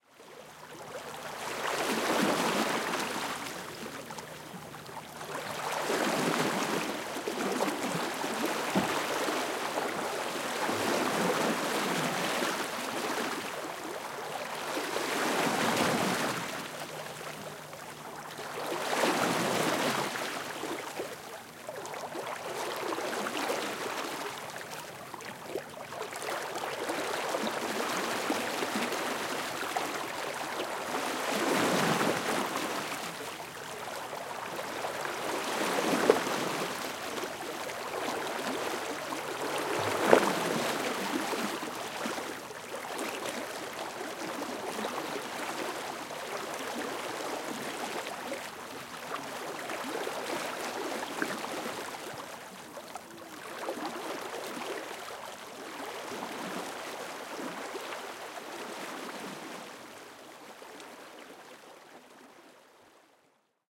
pope sailing wake
wake of a metallic sailing barge, low speed, close up.
Recorded at the pope.
small waves.
No motor
Arcachon, France, 2021.
Recorded with schoeps MS
recorded on Sounddevice 633
waves, sea, fifeld-recording, water, movement, stern